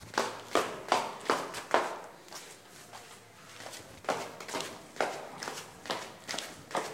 Going downstairs
legs, walking, ladder, downstairs